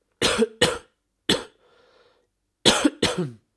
Man cough
A man is coughing
sickness, sick, cold, cough